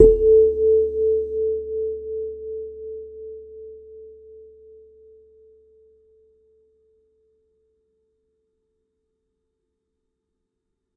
Sansula 05 A' 01 [RAW]
Nine raw and dirty samples of my lovely Hokema Sansula.
Probably used the Rode NT5 microphone.
Recorded in an untreated room..
Captured straight into NI's Maschine.
Enjoy!!!
acoustic, single-note, simple, one-shot, thumb-piano, percussion, sample-pack, tines, sampling, metal, raw, mbira, sansula, note, tine, recording, sample, kalimba